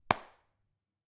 The sounds of punching.